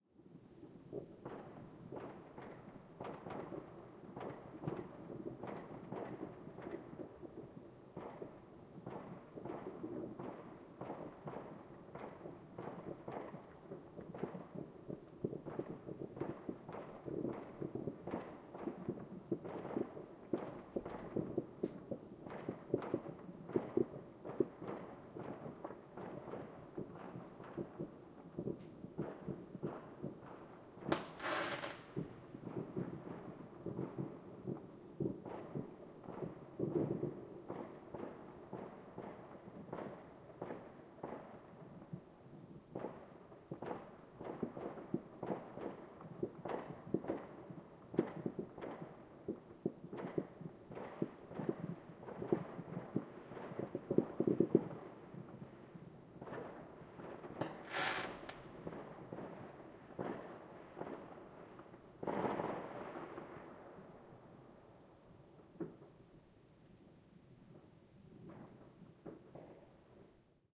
Fireworks, Distant, B
Raw audio of several distant fireworks. There are two instances of close fireworks going off: 0:30 and 0:57.
An example of how you might credit is by putting this in the description/credits:
The sound was recorded using a "H1 Zoom V2 recorder" on 1st January 2016.
new-year, rocket, firework